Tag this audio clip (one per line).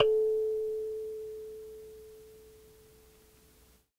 Jordan-Mills
collab-2
kalimba
lo-fi
lofi
mojomills
tape
vintage